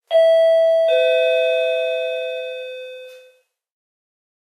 My front door bell recorded from my hallway - binaural recording.